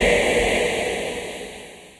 convoluted back to back loop 60 bpm 2h
This is loop 16 in a series of 40 loops that belong together. They all have a deep dubspace feel at 60 bpm and belong to the "Convoloops pack 01 - back to back dubspace 60 bpm" sample pack. They all have the same name: "convoluted back to back loop 60 bpm"
with a number and letter suffix (1a till 5h). Each group with the same
number but with different letters are based on the same sounds and
feel. The most rhythmic ones are these with suffix a till d and these
with e till h are more effects. They were created using the microtonik VSTi.
I took the back to back preset and convoluted it with some variations
of itself. After this process I added some more convolution with
another SIR, a resonator effect from MHC, and some more character with (you never guess it) the excellent Character plugin from my TC powercore firewire. All this was done within Cubase SX.
After that I mastered these loops within Wavelab using several plugins:
fades, equalising, multiband compressing, limiting & dither.
60-bpm, deep, dub, dubspace, loop, space